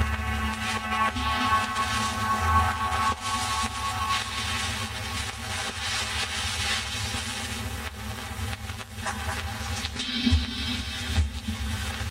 Single guitar chord fades out over a monolake-esq background. Background is spectrally processed record crackle, slight movement between channels. Sample ends with two drum hits.